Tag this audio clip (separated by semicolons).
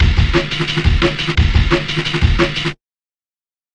amen; beat; break; breakbeat; dnb; drum; drums; jungle; loop